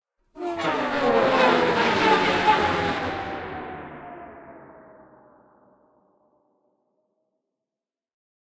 Spaceship Fly-by, A
Messing around with some of my old recordings. This was created by scraping a violin bow on the lid of a metal container, layering multiple of the recordings on top of one another, adding reverberation, and then pitch shifting.
An example of how you might credit is by putting this in the description/credits:
The sound was recorded using a "H1 Zoom recorder" on 28th January 2017 and edited on 20th July 2017 in Cubase.
by, fly, fly-by, flyby, pass, plane, scifi, ship, space, spaceship